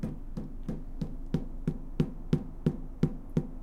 Footstep sound on slightly echoey metallic floor